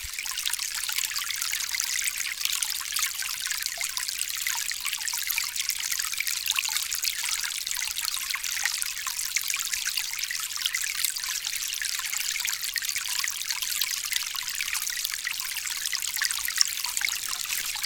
Sound made for a cancelled student game.
Unity C# Implementation Code:
using System.Collections;
using System.Collections.Generic;
using UnityEngine;
public class wateringAudio : MonoBehaviour {
public float maxVolume = .3f;
public float fadeInTime = 0.5f;
public float fadeOutTime = 0.25f;
float volumeUnreal = 0;
bool fadeIn = false;
bool fadeOut = false;
float nowFade;
public AudioSource audioSource;
bool pausedYet = false;
public float fadeCurve = 2;
void Start () { audioSource.loop = true;}
void Update () {
if (Input.GetKeyDown("s")) {
fadeIn = true;
fadeOut = false;
if (!pausedYet){
audioSource.Play();
pausedYet = true;
} else { audioSource.UnPause(); }
if (Input.GetKeyUp("s"))
fadeOut = true;
fadeIn = false;
if (fadeIn)
nowFade = Time.deltaTime * maxVolume / fadeInTime;
if (volumeUnreal + nowFade < maxVolume) {
volumeUnreal += Time.deltaTime * maxVolume / fadeInTime;
} else {
volumeUnreal = maxVolume;
fadeIn = false;
audioSource.volume = Mathf.Pow(volumeUnreal, fadeCurve);
plant, videogame, water, watering